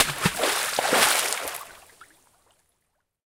Rock Splash
The splash of a relatively large rock in water (3-5 kg) into a small creek. Drops spattering on the water's surface and waves sloshing are heard after the initial splash.
Recorded with a H4n Pro internal mics
10/06/2021
Edited in Audacity
10/06/2021